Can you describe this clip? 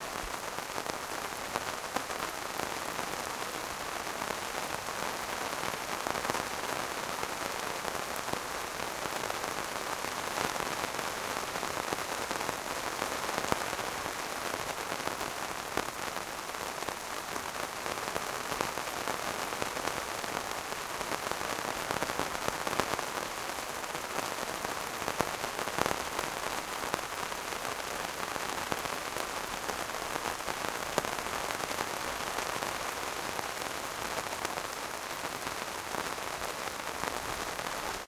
Summer rain recorded in July, Norway. Recorded underneath a parasol. Tascam DR-100.
weather, field-recording, rain, noise, parasol, static